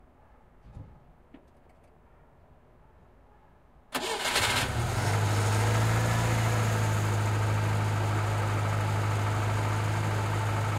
There is old Soviet car "Moskvich-412". My colleague try to start it and it turned out! But battery pack run out and my recorder stopped out.
Recorded 2012-10-16 at 10 pm.
car, Moskvich, Moskvich-412, noise, rumble, Soviet, start, start-car, USSR